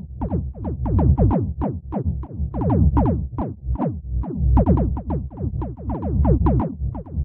17-glitch sequencer
"Interstellar Trip to Cygnus X-1"
Sample pack made entirely with the "Complex Synthesizer" which is programmed in Puredata
analog, experimental, idm, modular, pd